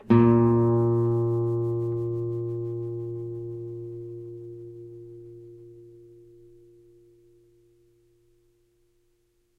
A#, on a nylon strung guitar. belongs to samplepack "Notes on nylon guitar".
note, guitar, music, strings, nylon, a, string